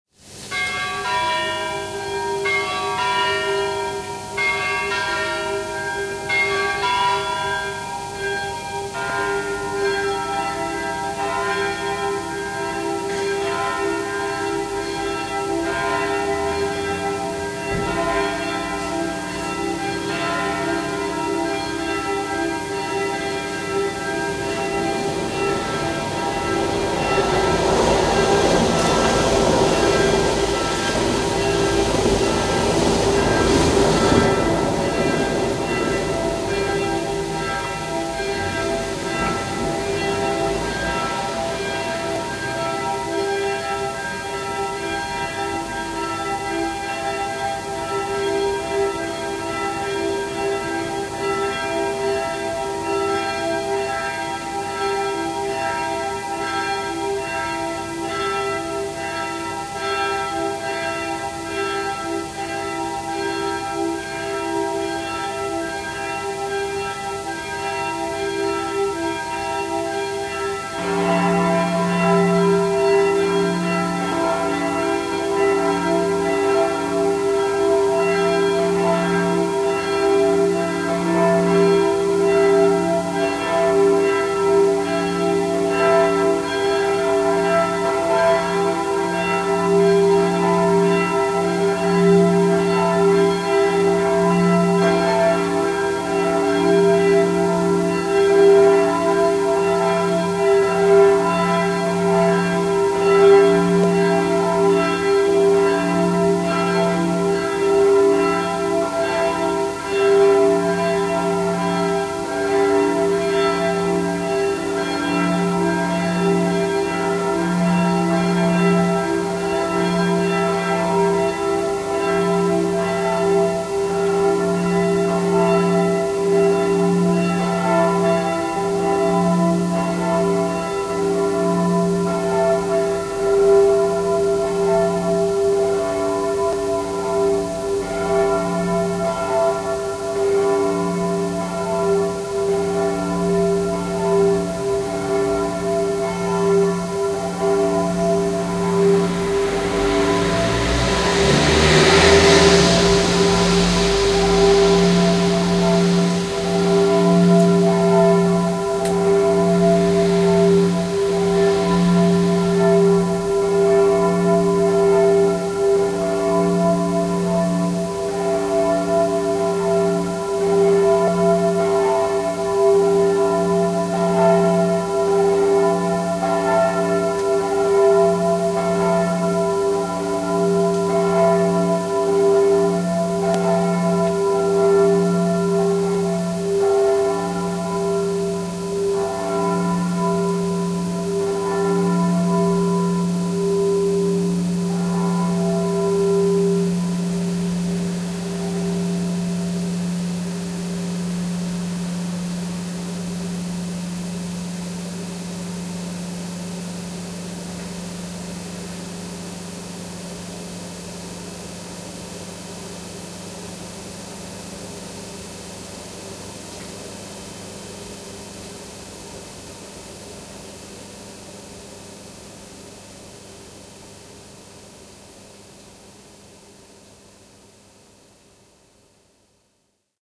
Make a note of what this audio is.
recording of the daily morning bells in an old German town at 6 a.m. nearly without traffic.
campanas, churchbell, glocke